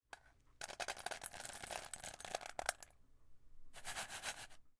Dog Food Fill
Filling Dog Food
Food, Dog